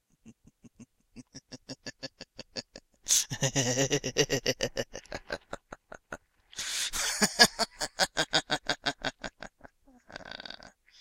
Evil Laugh 4
cackle, demented, evil, halloween, laugh, maniacal